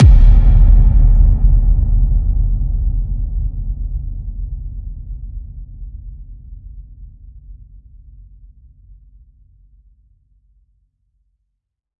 Reverb Kick 01
drum, reverb